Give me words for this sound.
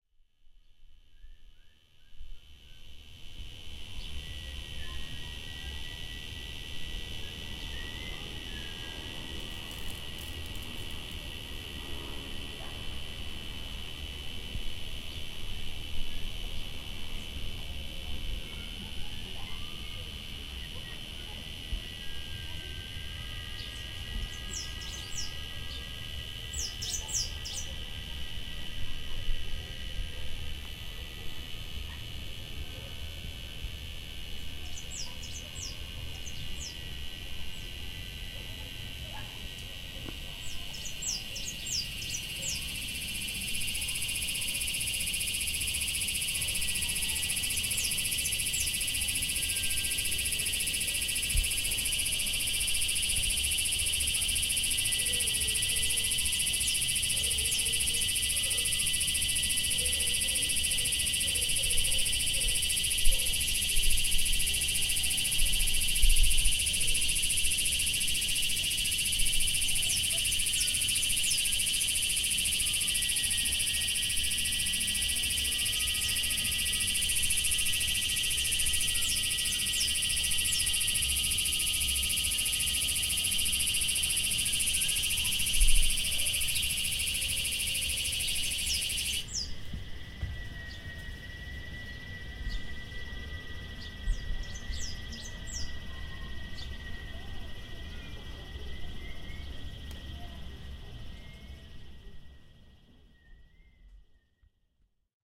FR.CTC.08.CoyuyoS.003.B
intense cicada (Quesada Gigas) ambience
zoomh4, noise, barking, cicadas, sound, field-recording, catamarca, argentina, nature, dog, summer, insects, ambience